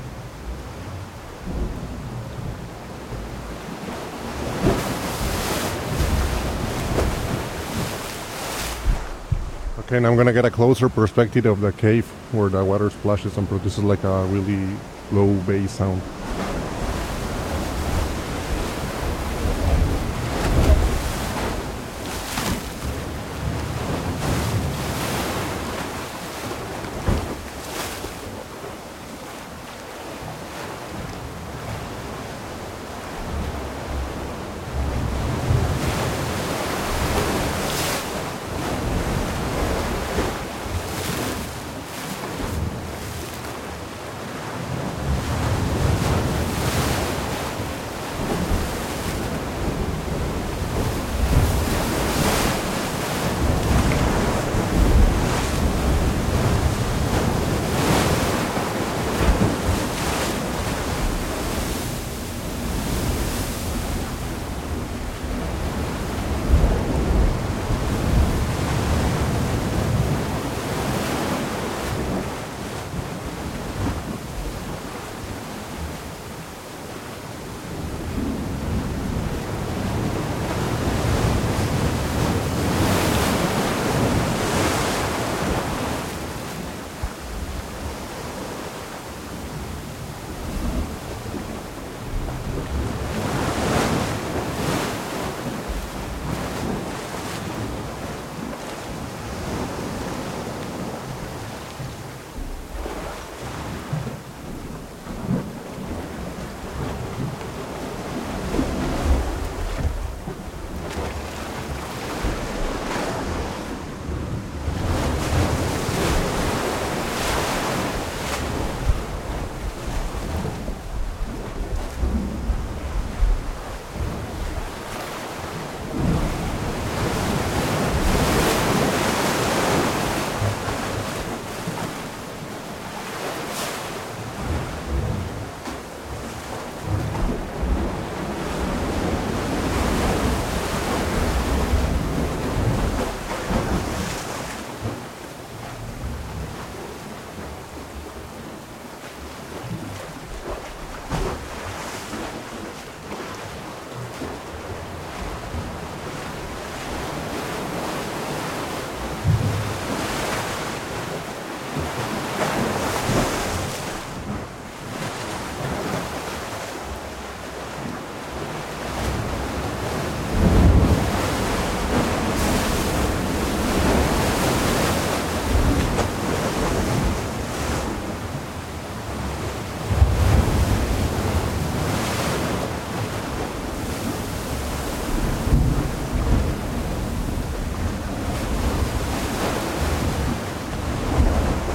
ocean cave002
a cave in the beach, water coming from the waves, recorded with a sennheiser 416
field-recording, cueva, ocean, tide, cave, sea